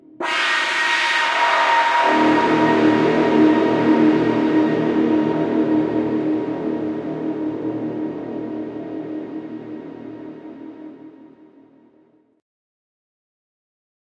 A loud synthesized scary blood-curdling scream. Part of my screams pack.
atmosphere, dark, electronic, fear, howl, noise, pain, processed, scream, synth, voice